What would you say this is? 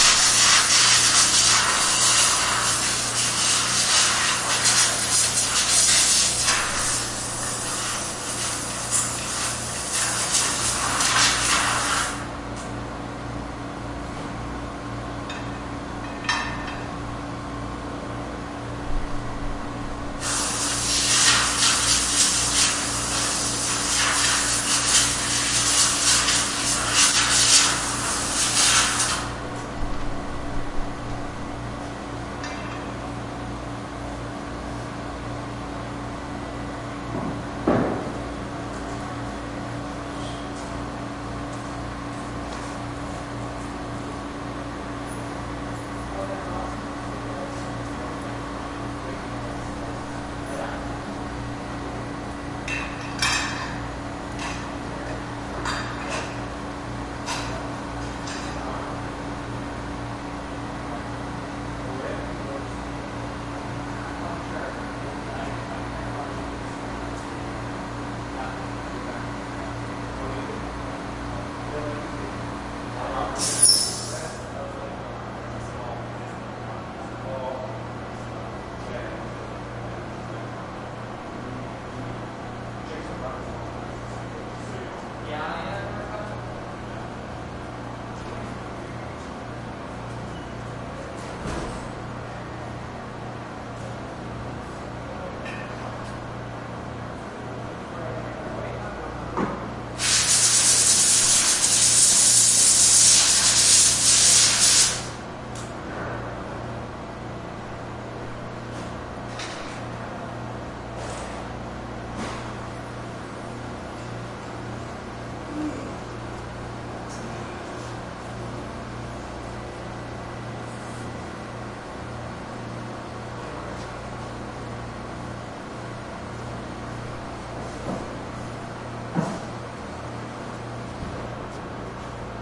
General Fusion industrial workshop factory ambience2 machine hum, bg activity +compressed air blower cleaning something
ambience, noise, workshop, factory, industrial